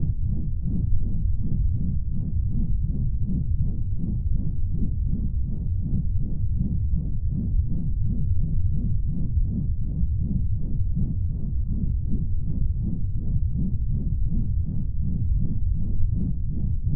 Industrial fan noise generated in Audacity. Designed for use in video games, may also work as distant engine hum.